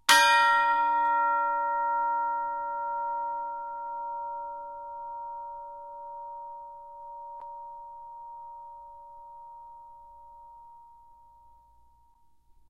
chimes a#3 ff 1
Instrument: Orchestral Chimes/Tubular Bells, Chromatic- C3-F4
Note: A# (Bb), Octave 1
Volume: Fortissimo (FF)
RR Var: 1
Mic Setup: 6 SM-57's: 4 in Decca Tree (side-stereo pair-side), 2 close
orchestra, chimes, decca-tree, sample, bells, music